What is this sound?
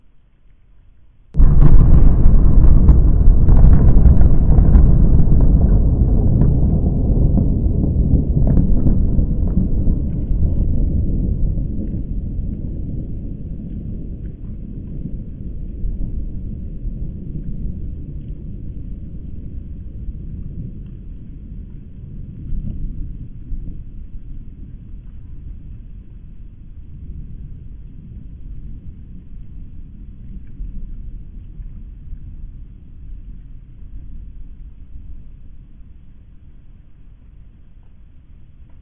atomic bomb
war, destruction, games, military, artillery, game, explosive, video, explosion